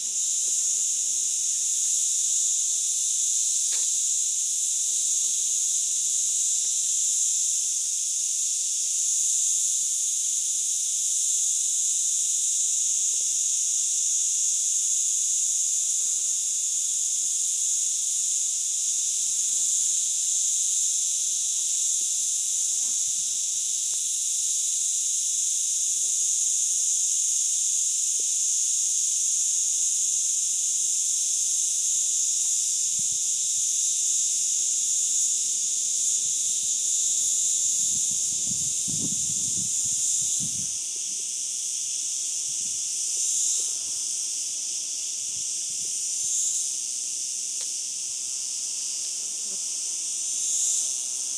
Singing Cicadas, + other insects buzzings and some wind near the end. PCM-M10 recorder with internal mics. Recorded on the Hoyazo de Nijar (Almeria, S Spain), an old volcano in the sub-desert area surrounding the Cabo de Gata
drought, arid, dry, Spain